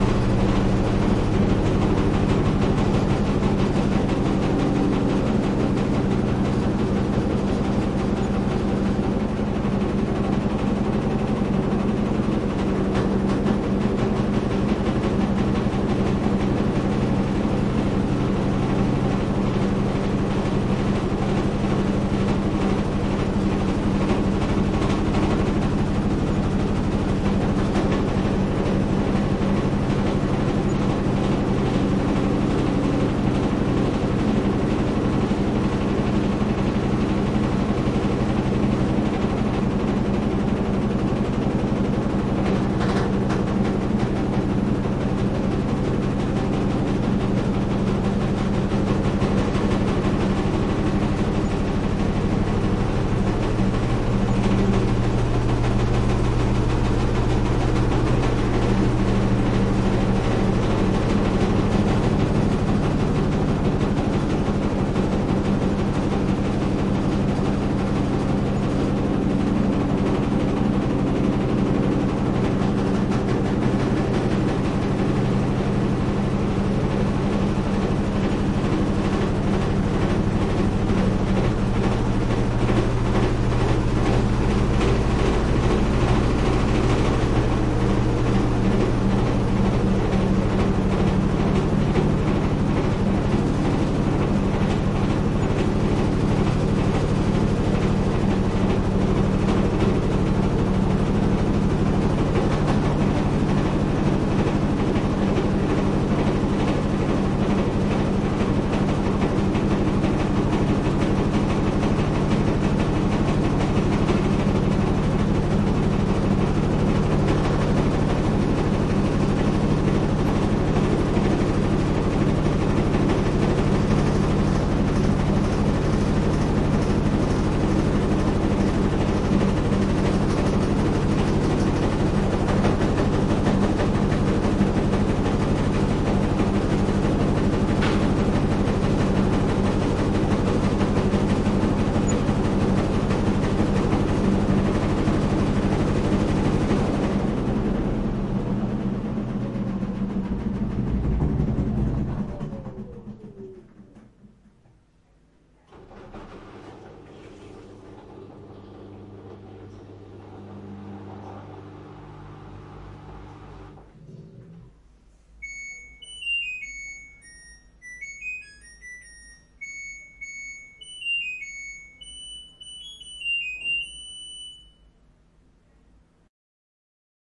LG inverter DirectDrive washing machine end of cycle sound
cycle, DirectDrive, end, inverter, LG, machine, washing